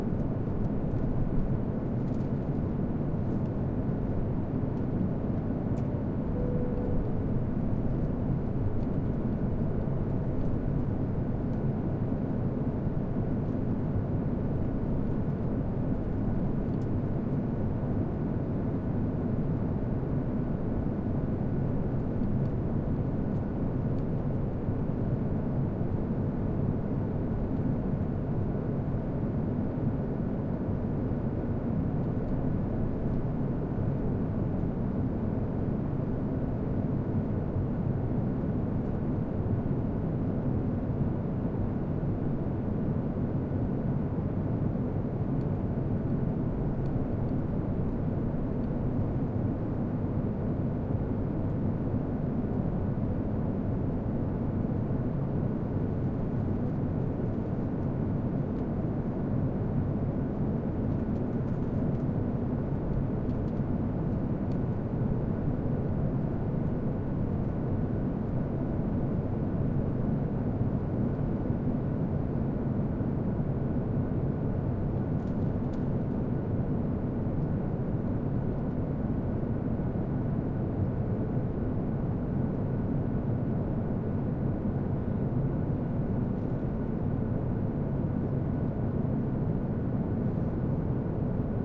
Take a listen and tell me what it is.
Boeing 737 Inflight Ambience - Qantas
Boeing 737 Inflight Ambiance somewhere between Adelaide and Sydney @ 30,000 feet.
aeroplane, aircraft, airplane, ambience, cabin-noise, field-recording, jet, machines